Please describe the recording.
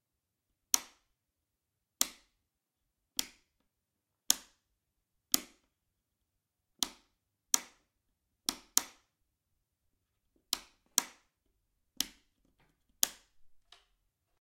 Light Switch 1
Light switch on and off. Natural clean sound with slight room reverb.